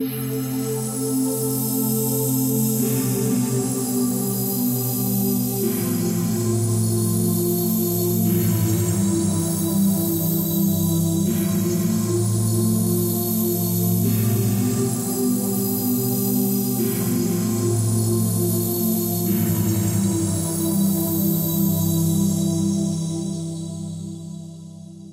Live - Space Pad 06
Live Krystal Cosmic Pads
Cosmic, Krystal, Live, Pads